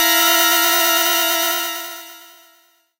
PPG 011 Dissonant Organ Chord C5
This sample is part of the "PPG
MULTISAMPLE 011 Dissonant Organ Chord" sample pack. It is a dissonant
chord with both low and high frequency pitches suitable for
experimental music. In the sample pack there are 16 samples evenly
spread across 5 octaves (C1 till C6). The note in the sample name (C, E
or G#) does not indicate the pitch of the sound but the key on my
keyboard. The sound was created on the PPG VSTi. After that normalising and fades where applied within Cubase SX.
chord, dissonant, multisample, ppg